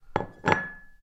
putting a ceramics saucer on a wooden table
kitchen, saucer, table